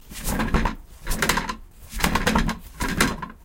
toilet roll
bathroom, paper, roll, tissue, toilet